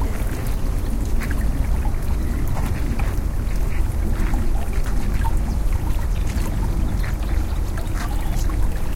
This European Robin Bird was singing in the debuggin drawers of the River Llobregat in Prat of Llobregat Delta. We could hear the the bird singing while the water of the river was running.